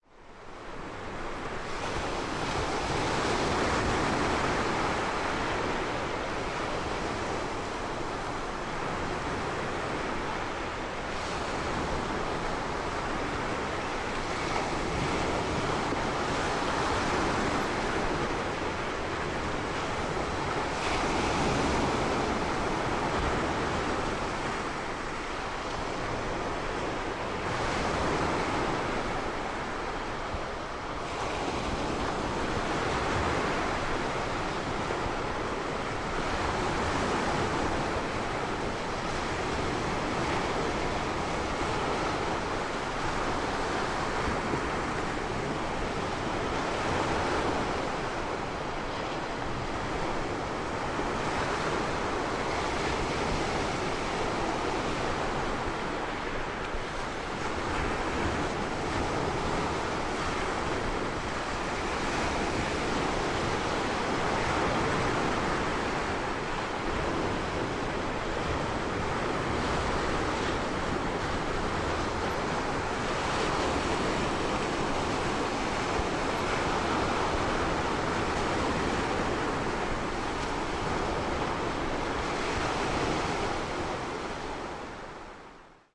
Distant recording of waves crashing on the shore at Boscombe Beach, UK